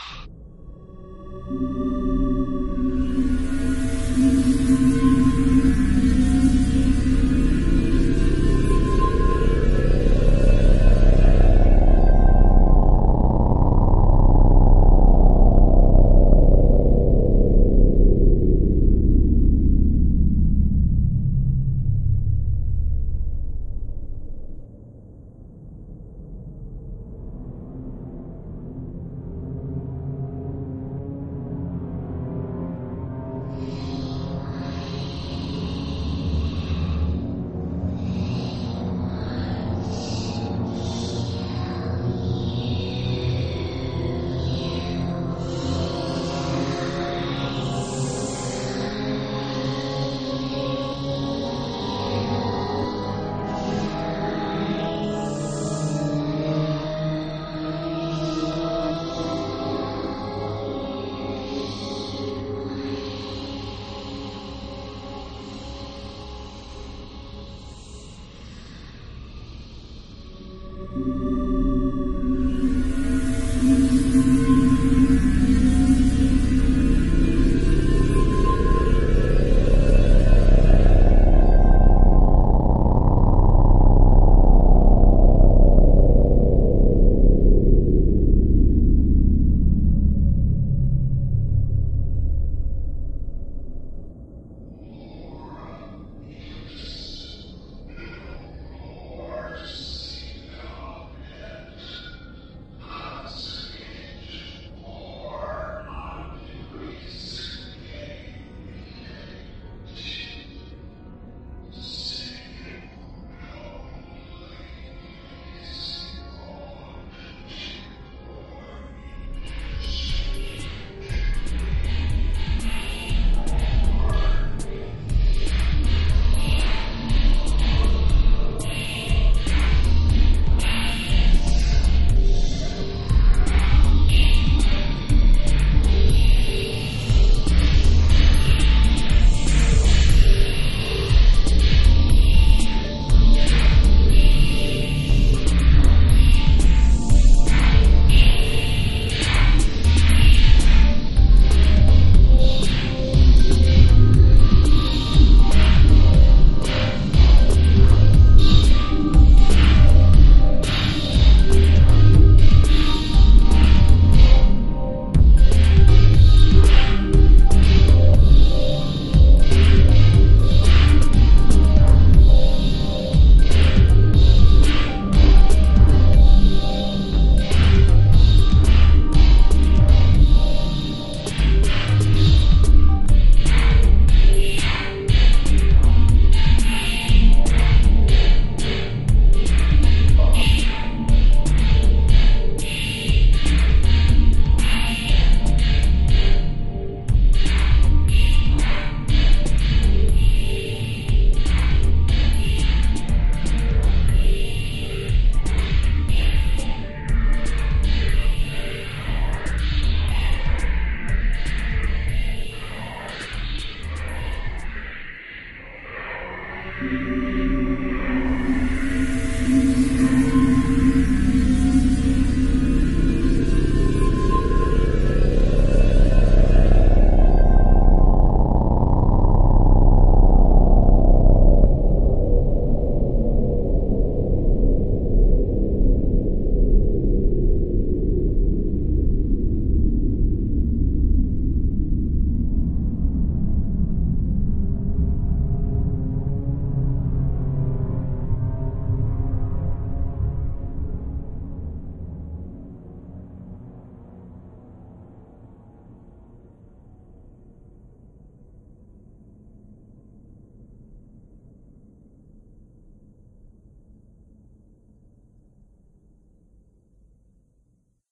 This upload includes 5 other sounds from free sound for halloween party background or haunted house: PB Death is coming, horror ambience 15, lorum_ipsum_whishpered_ed, creeping background OM-1, loop022. It's kind of go to the funeral then dance on the grave!